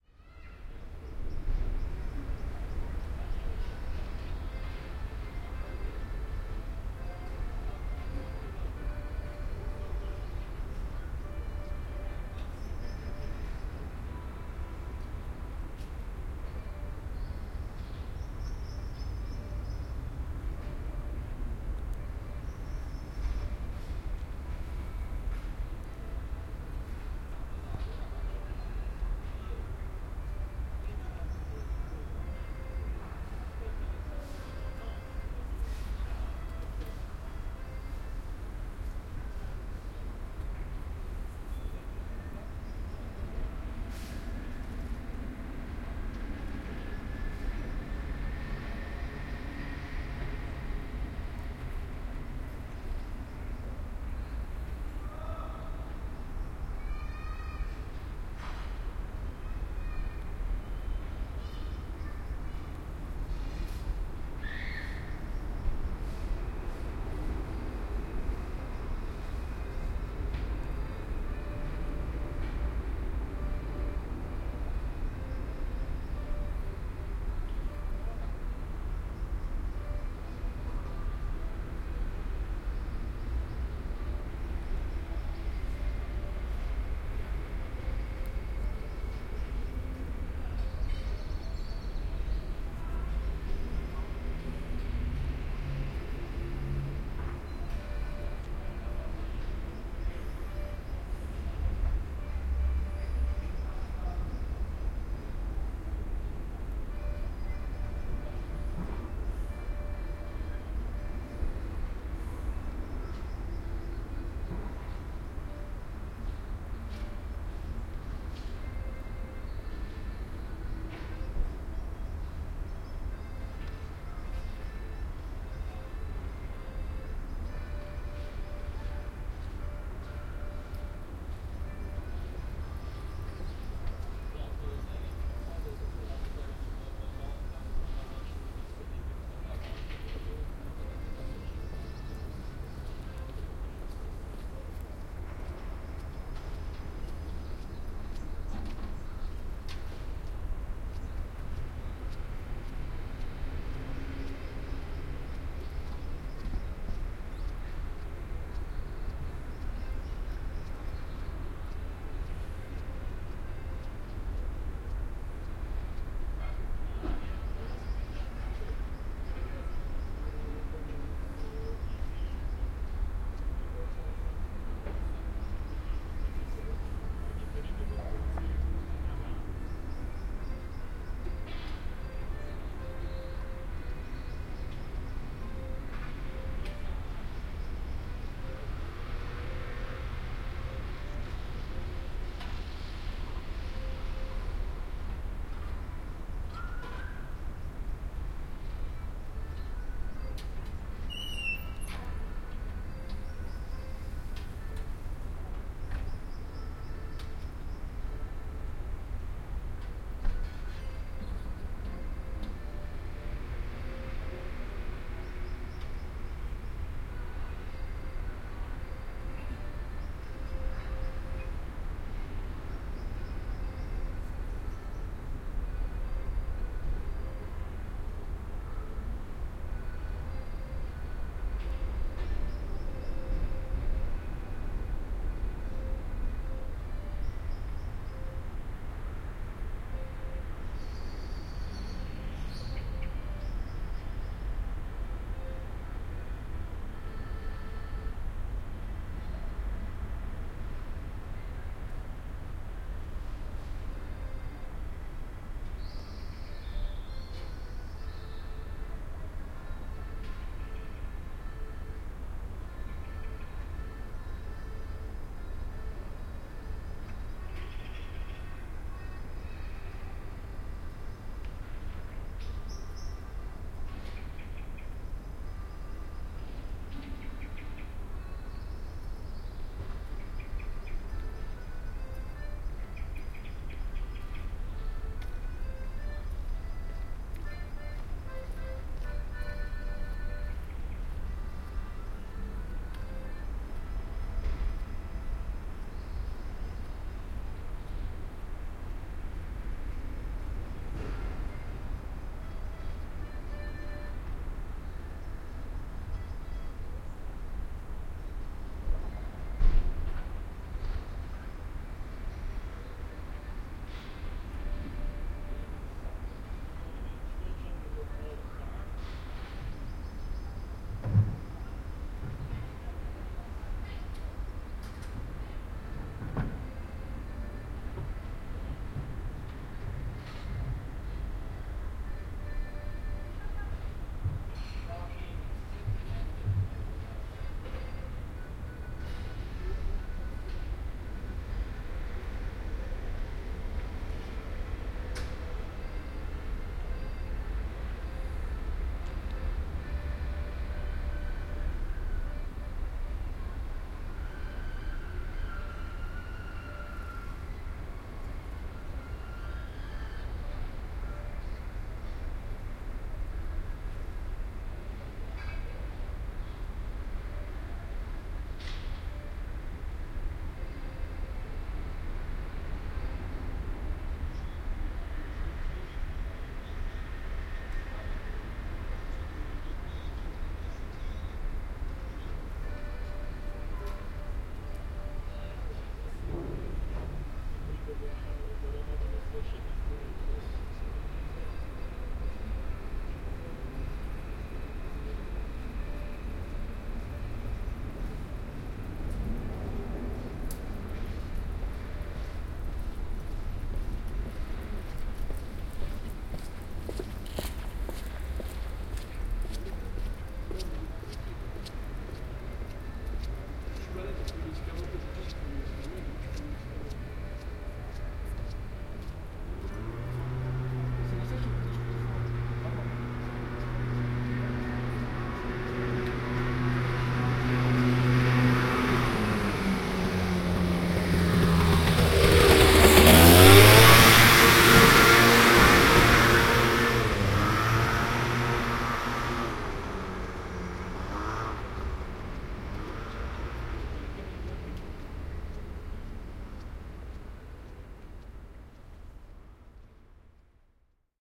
Paris Covid19 Sidestreet Accordion Kid Evening
Ambience recorded in a little street in the 20th in Paris during times of Covid19 confinement.
Very silent, with a kid playing accordion somewhere far in an appartment
n.b. this is a BINAURAL recording with my OKM soundman microphones placed inside my ears, so for headphone use only (for best results)
accordion, atmosphere, binaural, evening